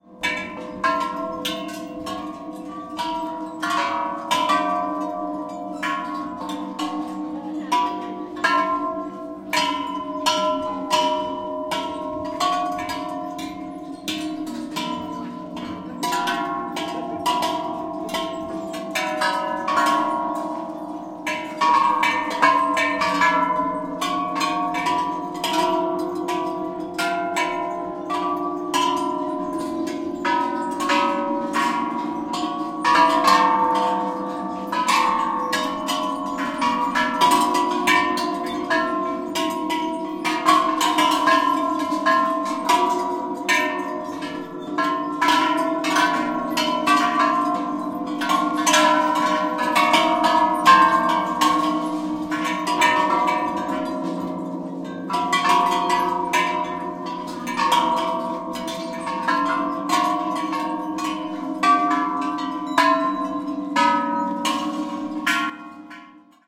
THAILAND WAT PHRATHAT DOI SUTHEP BELLS
Bells recorded in Wat Phrathat Doi Shutep, temple near Chiang Mai in Thailand, december 2012.